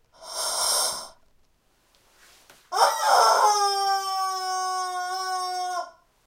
rubber chicken10
A toy rubber chicken
toy, cartoony, scream, honk, screaming, honking